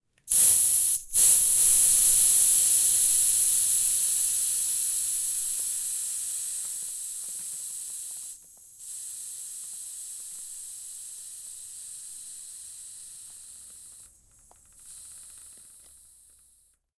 Sound of a pressure drop. Sound recorded with a ZOOM H4N Pro.
Son d’une baisse de pression. Son enregistré avec un ZOOM H4N Pro.